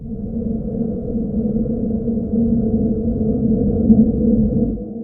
This sample was generated by blowing onto a web mic with the resulting sound low pass filtered and put through a deep reverb plug-in. The recording and sound processing was done using Ableton Live 7.